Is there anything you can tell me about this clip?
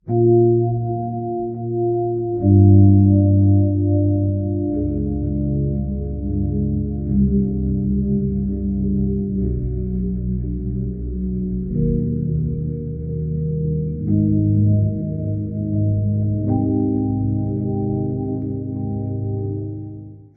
I made a creepy sound